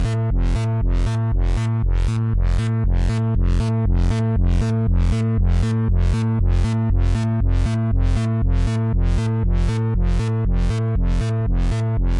analogue synthesizer (Realistic Moog) produces rhythmic loop.